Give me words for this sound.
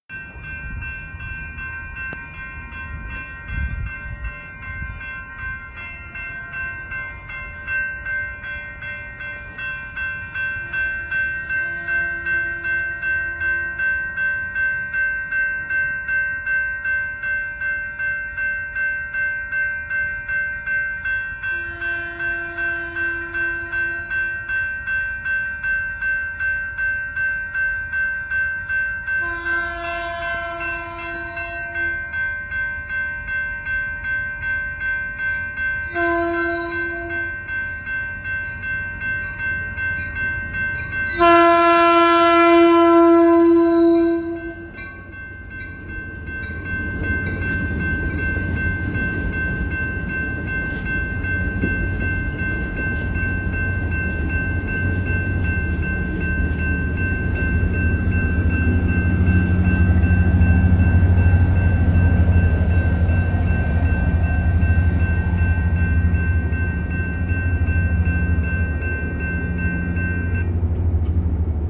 Caltrain train passing with 3 horn blasts. Residential neighborhood. Slow moving train. Crossing guard bells chiming loudly.
Recorded on a Samsung S8.
guard, horn, passing, rail, railway, residential, toot, train